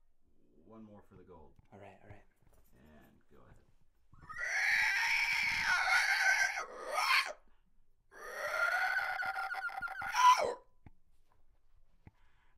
Another variation on the pterodactyl sound
alien, dinosaur, raptor